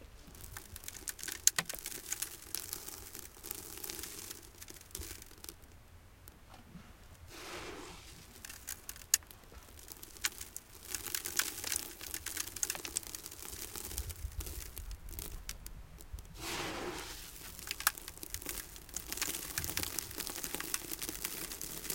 sand pour on sand FF662
sand, sand pour on sand
sand, pour, pouring